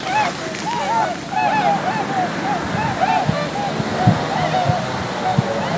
Flying Birds
birds, nature, recorder